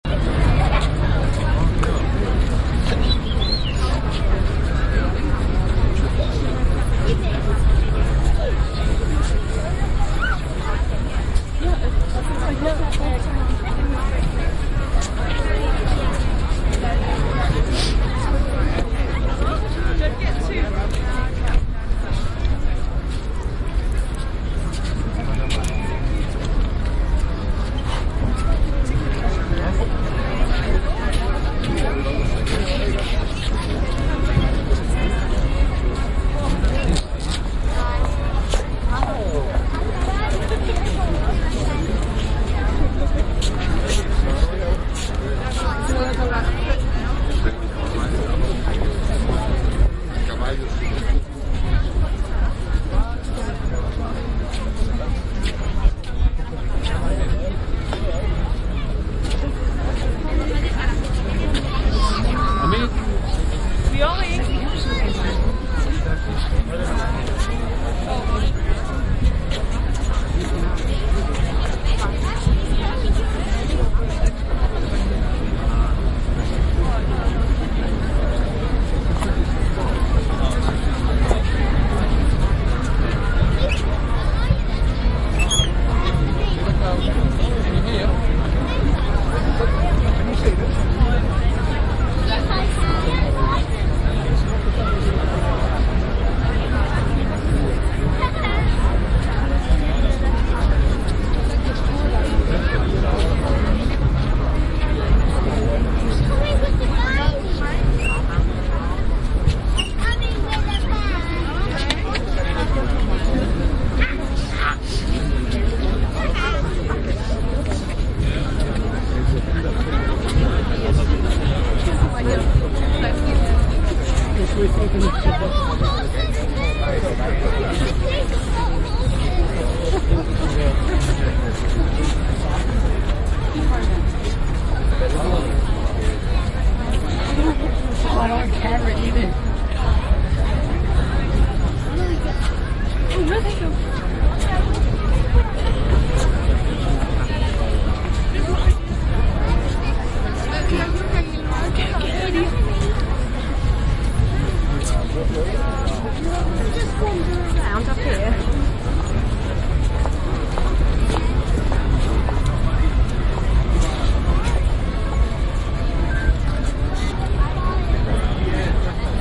St James Park - Still Waiting for Changing of the guards
ambiance, ambience, ambient, atmosphere, background-sound, city, field-recording, general-noise, london, soundscape